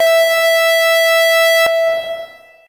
K5005 multisample 01 Sawscape E4
This sample is part of the "K5005 multisample 01 Sawscape" sample pack.
It is a multisample to import into your favorite sampler. It is a patch
based on saw waves with some reverb
on it and can be used as short pad sound unless you loop it of course.
In the sample pack there are 16 samples evenly spread across 5 octaves
(C1 till C6). The note in the sample name (C, E or G#) does indicate
the pitch of the sound. The sound was created with the K5005 ensemble
from the user library of Reaktor. After that normalizing and fades were applied within Cubase SX.
pad, multisample, saw, reaktor